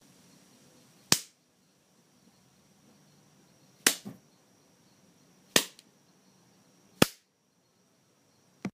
"Slap" is the sound made when you slap a person in the face, bum or any fleshy surface.